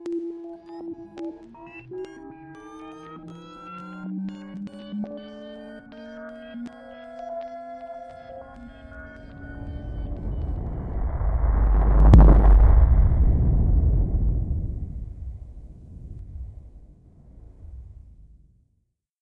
Big Bang pitchup
Winding up to a cosmic explosion with some interesting stereo imaging.
bang, cosmic, explosion